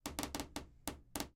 06 Chirrido Madera 2
Foley, Farm, home